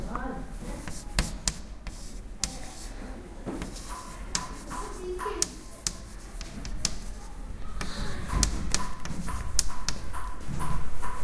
SonicSnap SASP AgataLitang
Field recordings from Santa Anna school (Barcelona) and its surroundings, made by the students of 5th and 6th grade.